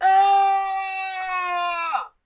A male scream. Not edited. Recorded with a CA desktop microphone.